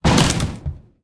Wooden furniture or door hit hard enough to break
Original recordings:
"Window hit without breaking" by Soundkrampf, cc-0
"Chairs Break, Crash, pieces movewav" by Issalcake, cc-0
"Weak table crash, breakwav" by Issalcake, cc-0
"Crack of Branch 3" by Adam_N, cc-0
break, crack, door, furniture, smash, wood